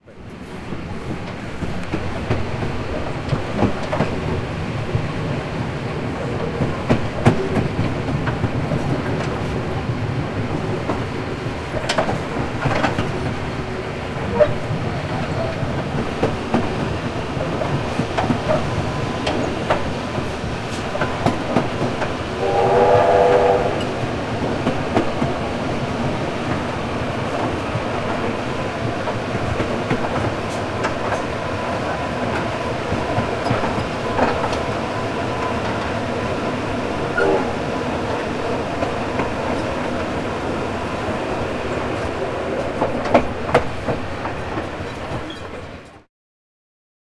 Interior Steam Train Between Carriages
Recorded inside a slow moving steam passenger train. Recorded on a Zoom 4.
steam-train, railway, train, rail